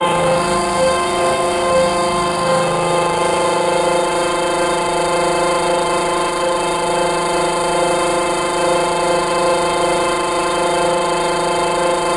Binaural Ringmod Texture from Reason Subtractor and Thor Synths mixed in Logic. 37 samples, in minor 3rds, C-1 to C8, looped in Redmatica's Keymap. Sample root notes embedded in sample data.
Synth, Binaural, Multisample, Ringmod, Texture